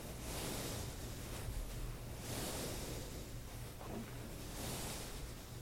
Som de mão passando em almofada, com o intuito de imitar o som do mar.
Captado com microfone condensador cardioide em estúdio.
Gravado para a disciplina de Captação e Edição de Áudio do curso Rádio, TV e Internet, Universidade Anhembi Morumbi. São Paulo-SP. Brasil.